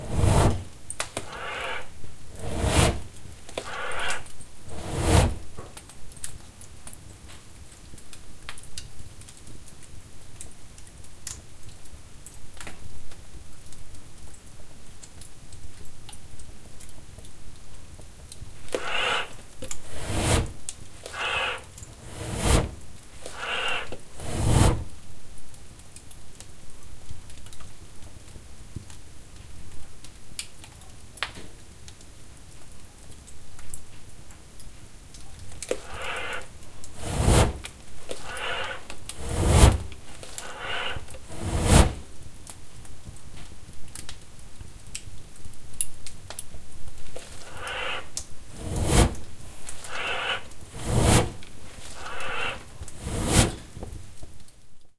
Recording of a chimney while fire is being intensified with bellows.
Fuego en la chimenea mientras es avivado con un fuelle.
Recorder: TASCAM DR40
Internal mics